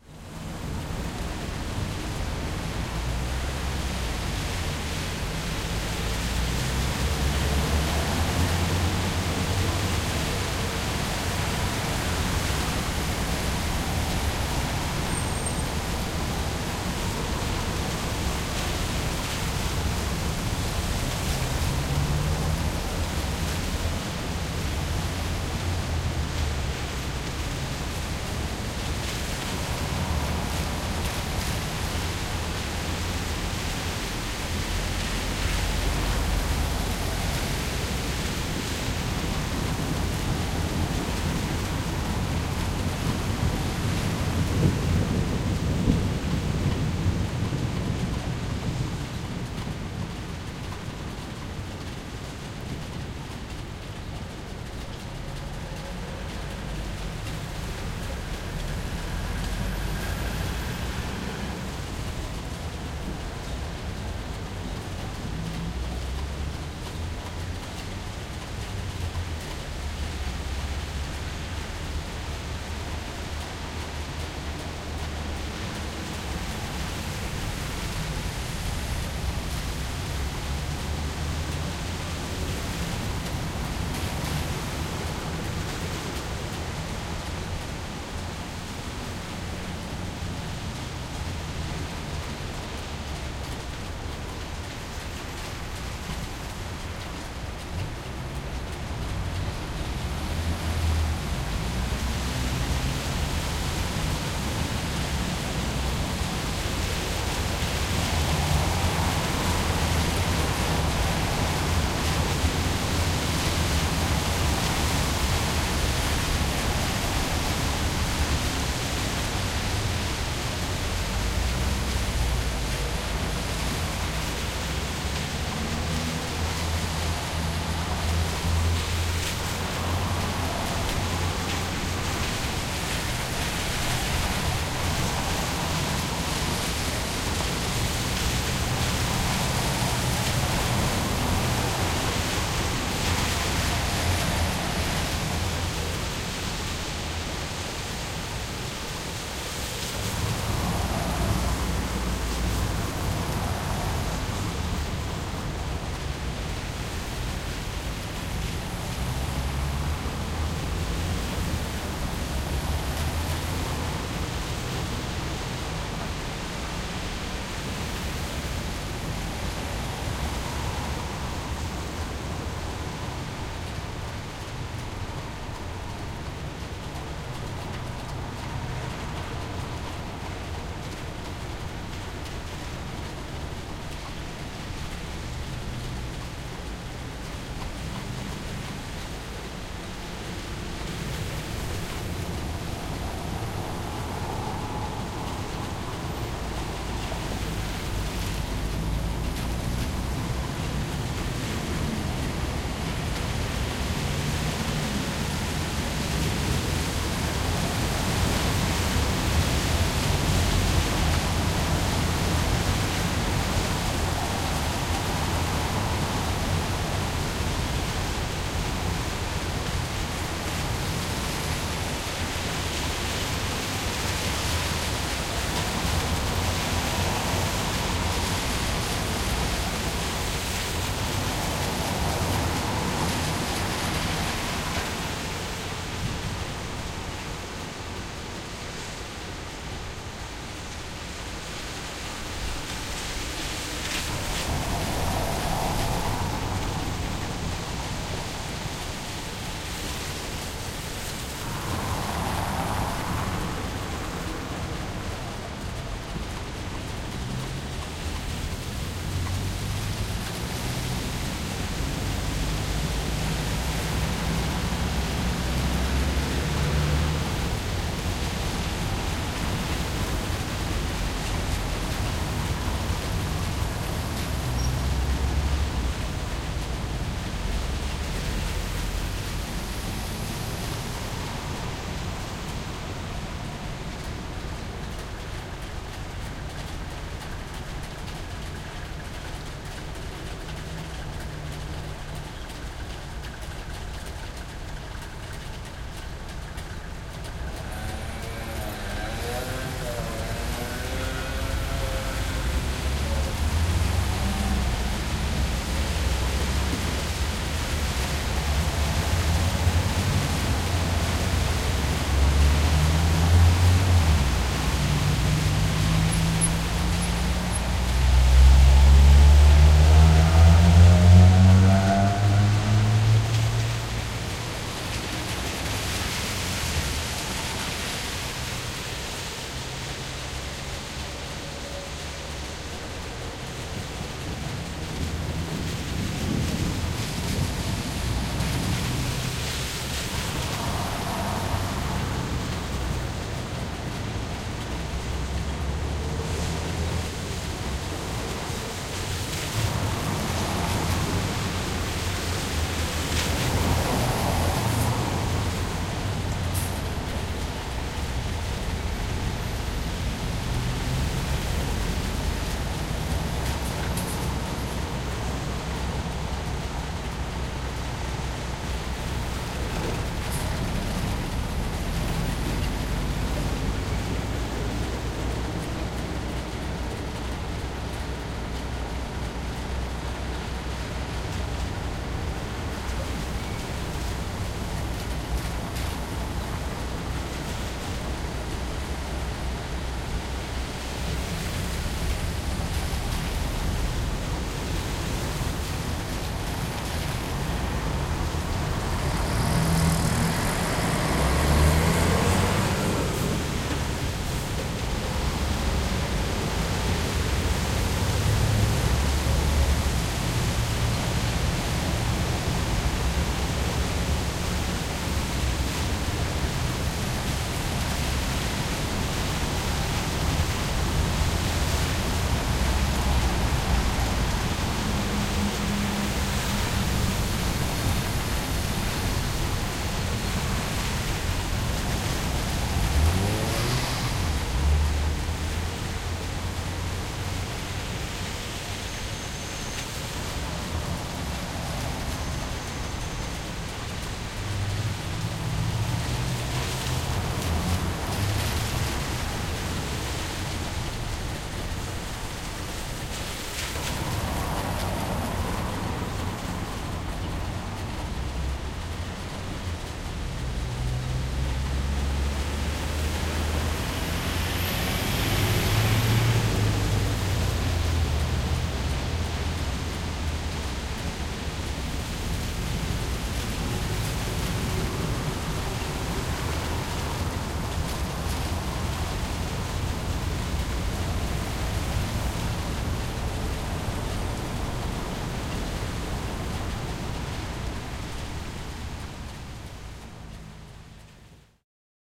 Rainy street in Maribor
A rainy afternoon in Maribor. Different vehicles passing and stopping at the traffic light. Rain and some soft thunder.
field-recording, traffic, rain, city, ambience, cars